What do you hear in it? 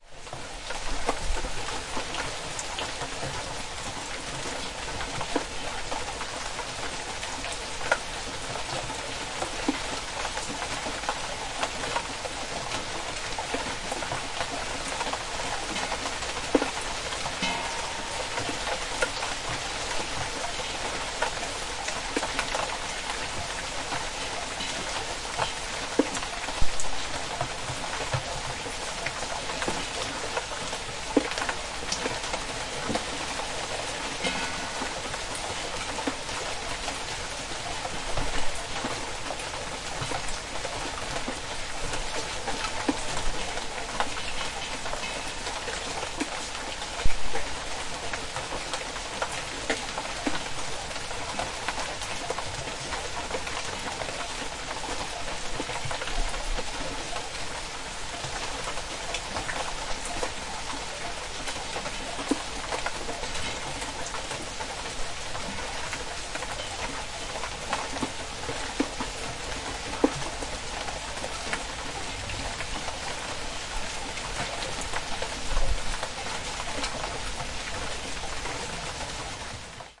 Summer Rain in Aberteifi
Stereo recording from the kitchen doorway made with an Edirol R44 and Behringer C4 mics. You might also hear the sound of 6 gallons of honeysuckle wine fermenting in the kitchen behind. 16/07/15
weather, shower, Wales, field-recording, rain, raining, nature, UK, rainfall